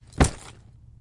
Luggage Drop 2
Dropping a luggage bag full of various items.
Drop, Thud, Punch, Foley